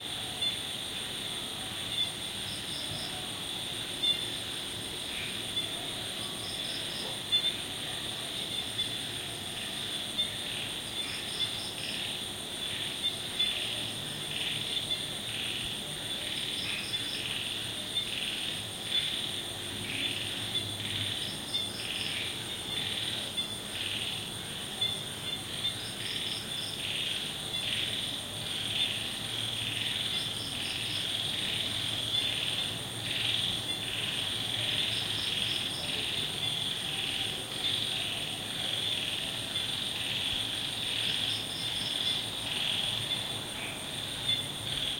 asian night sounds 1
recorded in Thailand with ZOOM H4N